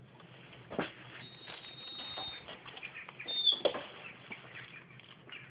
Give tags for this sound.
door; squeak; weak

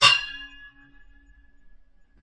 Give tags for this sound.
ancient; knight; sword-slash; soldier; sword; blade; slash; fighter

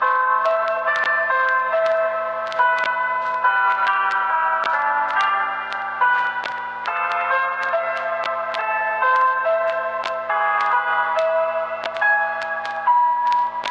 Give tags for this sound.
old; piano; more; noise

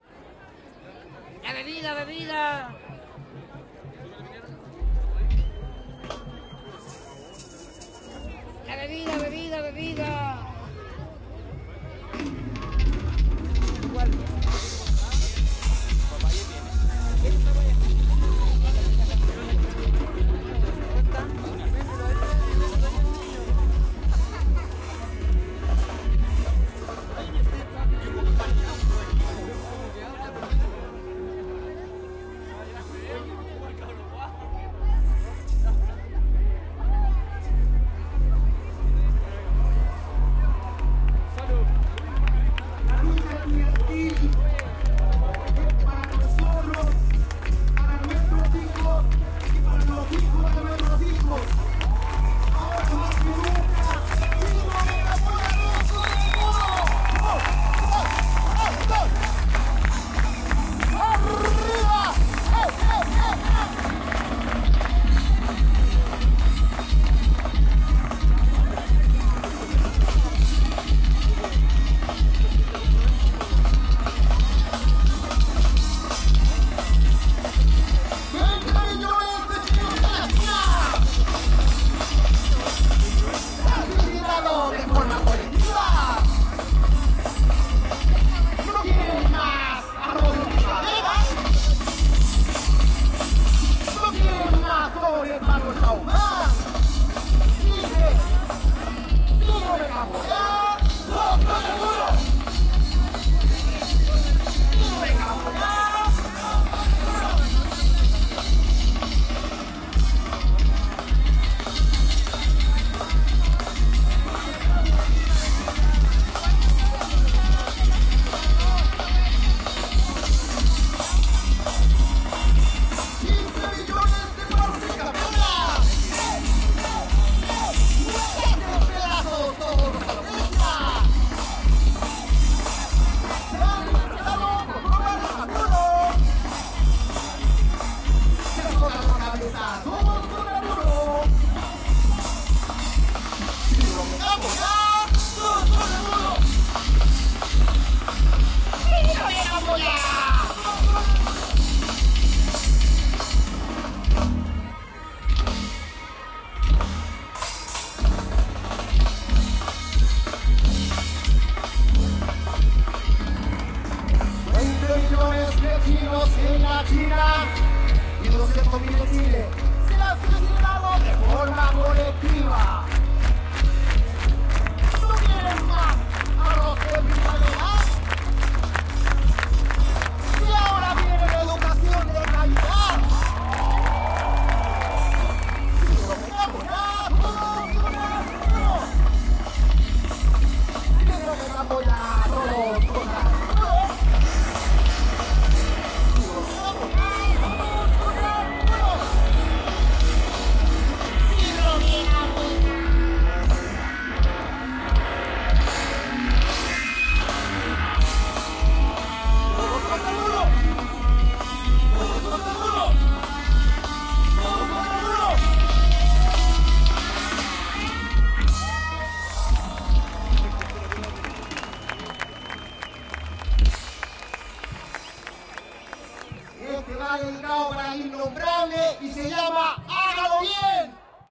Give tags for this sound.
publico; ohiggins; movimiento; santiago; estudiantil; sinergia; educacion; sindrome; camboya; familiar; park; domingo; crowd; chile; parque; recital

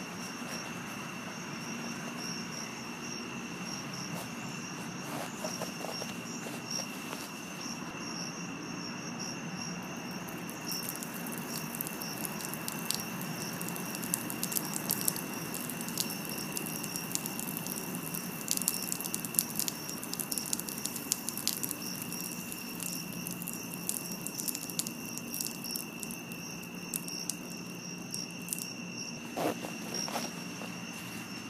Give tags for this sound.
bathroom; liquid; man; outside; park; parking-lot; pee; peeing; piss; pissing; suburbs; toilet; urinate; urinating; zipper

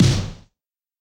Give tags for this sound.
idm; hits; samples; kit; noise; techno; experimental; drum; sounds

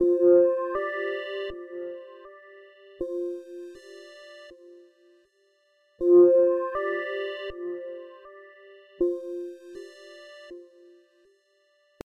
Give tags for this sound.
ambient; pad; texture

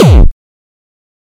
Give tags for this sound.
bass; beat; distorted; distortion; drum; drumloop; kick; kickdrum; melody; progression; trance

